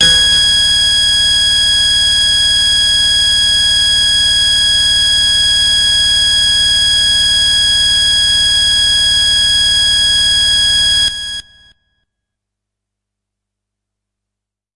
Harsh Lead - G#5
This is a sample from my Q Rack hardware synth. It is part of the "Q multi 010: Harsh Lead" sample pack. The sound is on the key in the name of the file. A hard, harsh lead sound.
synth, multi-sample, hard, electronic, waldorf, harsh, lead